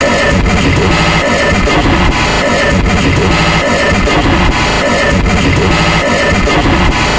- tipak Moving Machine loop sfx foley 100BPM Mastered 01
Moving Machine loop sfx foley 100BPM Mastered
I recorded my mouth voice sounds and used Yellofier.
Edited: Adobe + FXs + Mastered
Machine, Mastered, Moving, digital, effect, electronic, fx, glitch, lo-fi, sfx, sound, sound-design, strange